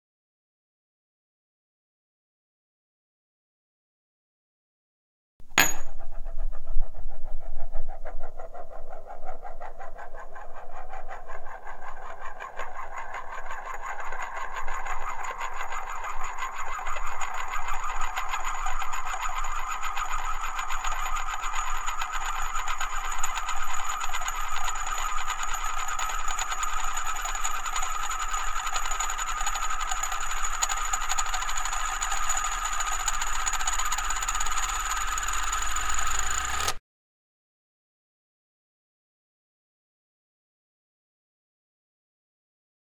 Spinning a 3 inch diameter x 1/8 inch thick aluminum disc on a linoleum table. Recorded in mono with an Edirol R44 recorder and a Shure SM81 microphone.